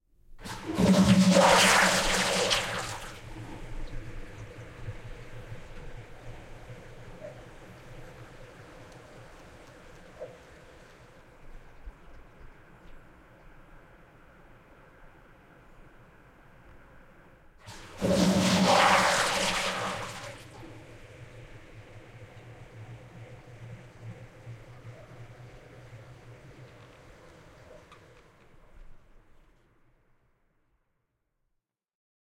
Old Toilet Flush
old, toilet, flush